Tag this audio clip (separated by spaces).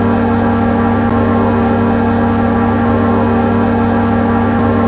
Atmospheric Background Everlasting Perpetual